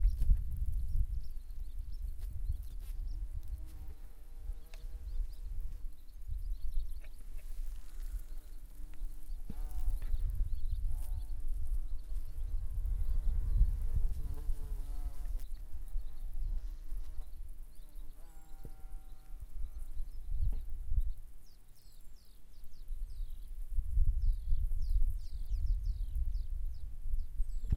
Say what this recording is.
Bees and the Birds 003
A mixture of chasing bees around the garden and leaving the microphone sat recording the atmosphere or a large number of bees collecting pollen from a bush in the Scottish countryside.
Scotland, summer, garden, birds, Bees